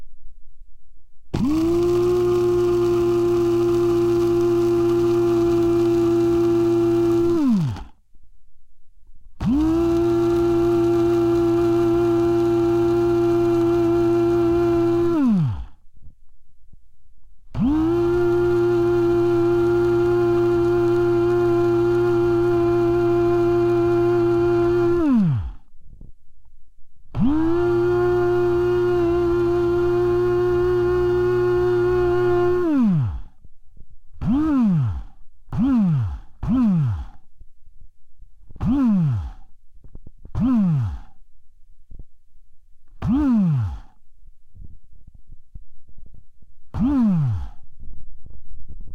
Contact mic attached to coffee grinder. Recorded into H4N.
machine, whirring